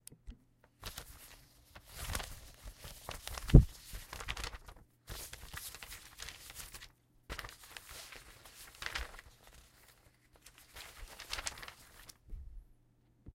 shuffling papers 1
shuffling papers zoom h1
papers, sheets